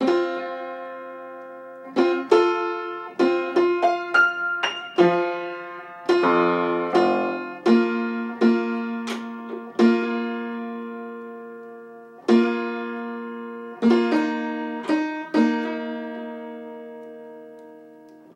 Piano tuning, multiple octaves, tuning unisons in mid-range.